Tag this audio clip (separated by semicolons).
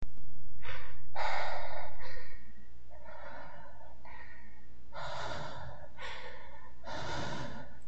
scared; breathing; ragged; rough